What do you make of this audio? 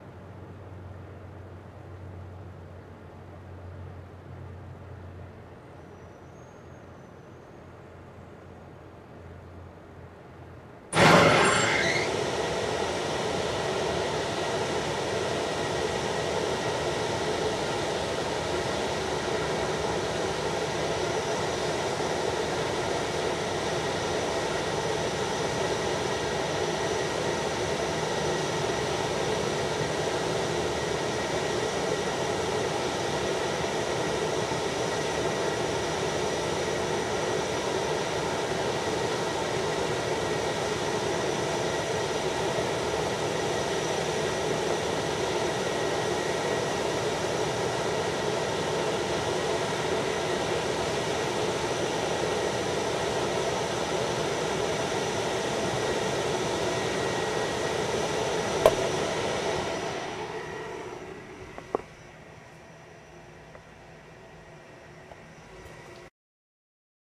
Scary Machine Startup
The roar of a industrial rooftop ventilator coming to life (starts at 00:10). It is a large and scary whirring, mechanical, grinding sound.
Used in Episode 10, "Decoherence" of the Genius-podcast audio drama, and used to be the sound of the Thames Flood Gate being deployed.
scary, factory, IGNITION, air-conditioner, start, machinery, machine, large, outdoor, motor, industrial, ventilator, mechanical, engine, grinding, noise